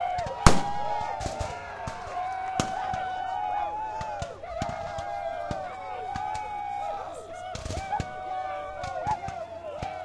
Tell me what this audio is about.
civil war battle noise
noise from a civil war reenactment